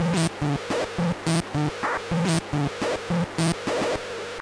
Elek Perc Loop 003 Var9 Assembled
In this recording session the Mute Synth 2 produced several glitched loops and quasi-loops. Sounded like it was trying to get this rhithm out but never managing to get it quite right.
I gave it a little hand by re-arranging one of the quasi-loops into this loop using Audacity.